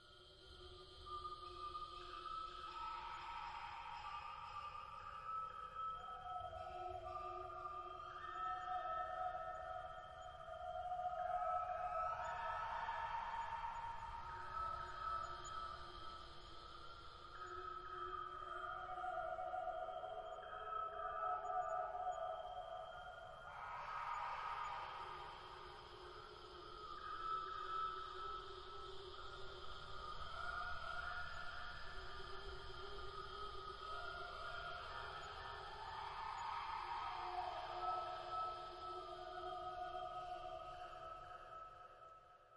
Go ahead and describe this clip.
worlun owls
ambience, ambient, atmosphere, dark, delay, owl, owls, reverb